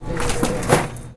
Coho - Shutting Cash Register
This is a recording of the cashier at the Coho closing the cash register. I recorded this with a Roland Edirol.
cash, ring, money, cashier, cash-register, beep, aip09